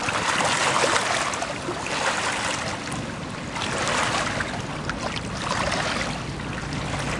Como lake water with traffic noise
water, lake